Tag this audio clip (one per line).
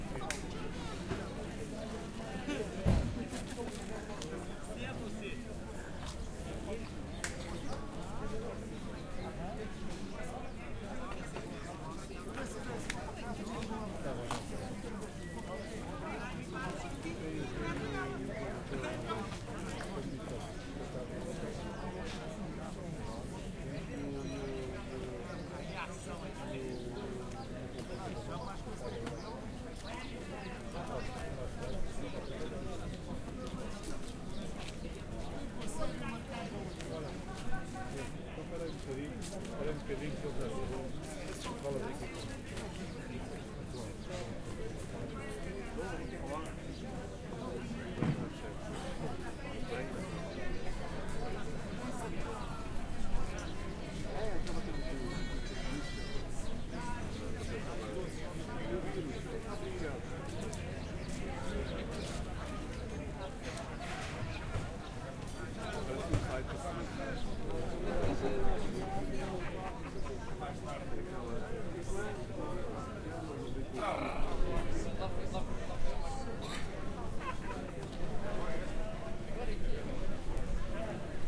city
field-recording
flea-market
lisbon
portuguese
soundscape
street
voices